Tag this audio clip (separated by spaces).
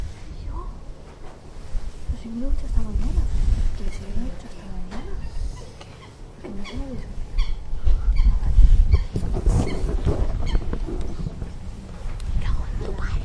bird birds birdsong Deltasona field-recording forest llobregat nature